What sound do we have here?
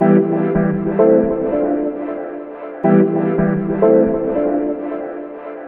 Synth Loop 15
Synth stabs from a sound design session intended for a techno release.
design, experimental, loop, oneshot, pack, techno